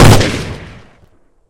One of 10 layered gunshots in this pack.